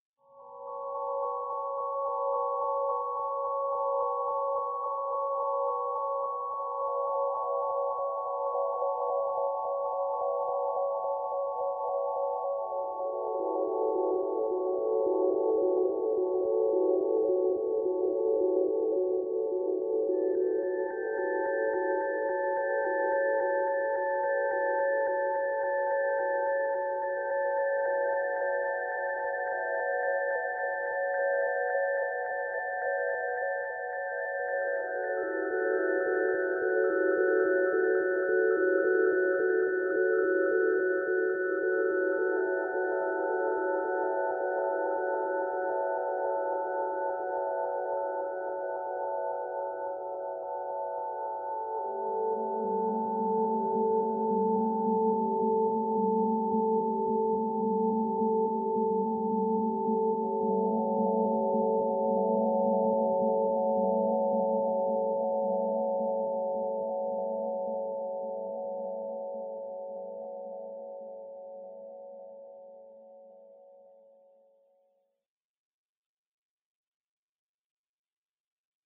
space music ambient
Created using a patch on the Roland MC-505. I recorded it through a M-Audio fast track pro into Sequel 2.
ambience, ambient, atmosphere, float, meditation, pad, relax, relaxing, soundscape, space, synth